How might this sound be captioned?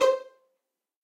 One-shot from Versilian Studios Chamber Orchestra 2: Community Edition sampling project.
Instrument family: Strings
Instrument: Violin Section
Articulation: pizzicato
Note: C5
Midi note: 72
Midi velocity (center): 95
Microphone: 2x Rode NT1-A spaced pair, Royer R-101 close
Performer: Lily Lyons, Meitar Forkosh, Brendan Klippel, Sadie Currey, Rosy Timms
midi-velocity-95, violin, multisample, strings, violin-section, single-note, midi-note-72, c5, pizzicato, vsco-2